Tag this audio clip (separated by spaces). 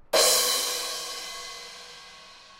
Pop recording Elementary sampling